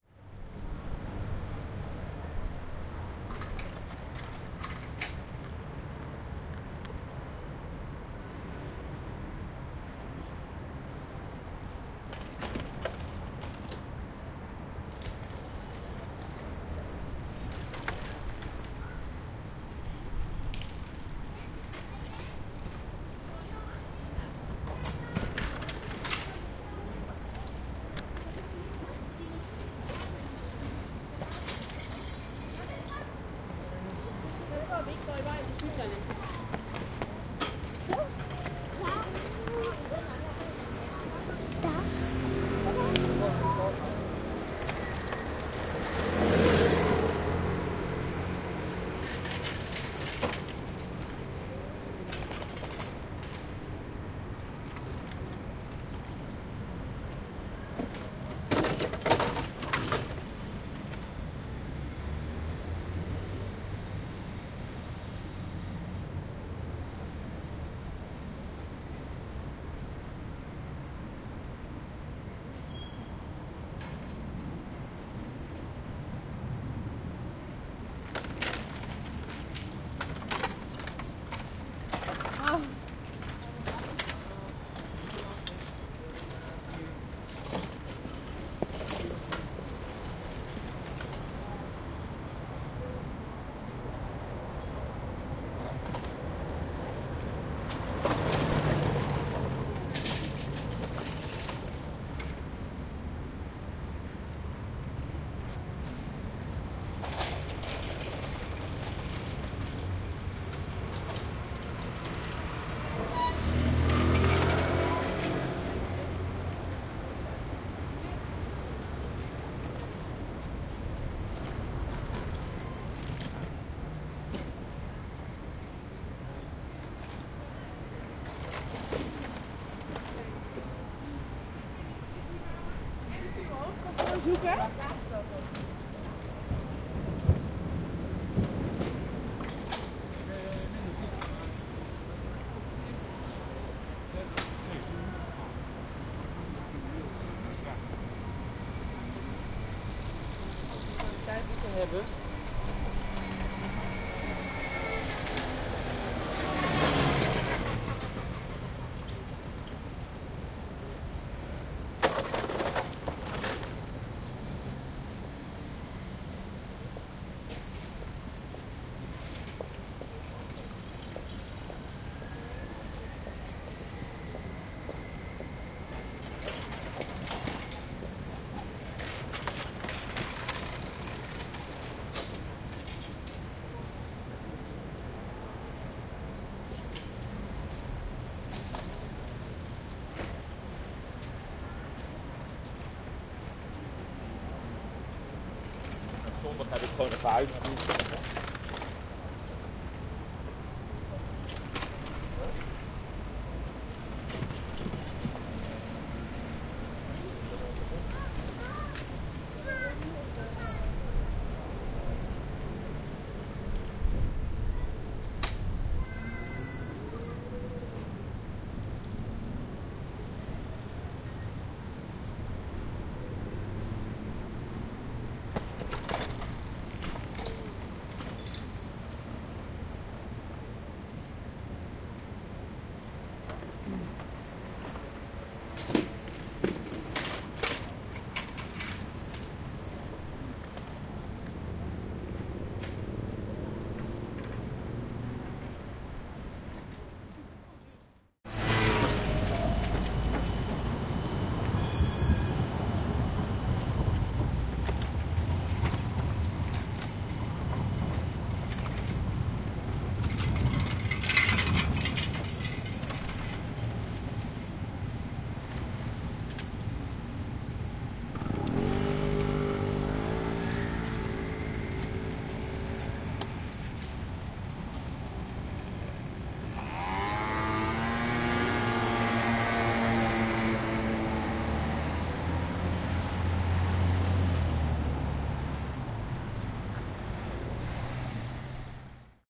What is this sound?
Street Ambience (Bicycles!), Amsterdam, NL
Couple of recordings of the streets of Amsterdam, medium to heavy traffic - lots of bikes and scooters!
city, moped, noise, people, bike, pedaling, road, urban, ambient, street, scooter, field-recording, freewheel, traffic, bicycle, cars, town, ambience